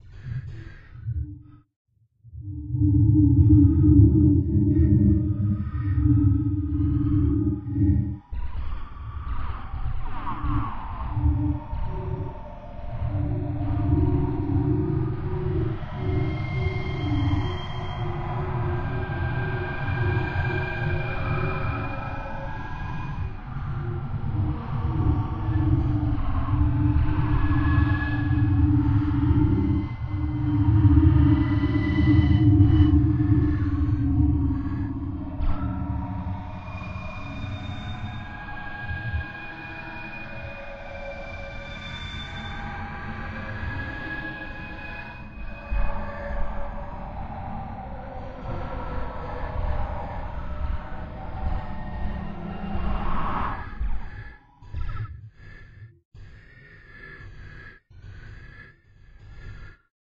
Created in the image synth room of Metasynth. A plan image of Mies van der Rohe's Barcelona Pavilion waqs imported and then echo added. A multi-sampler of my own voice was used to synthesize it in a quarter-tone mapped scale.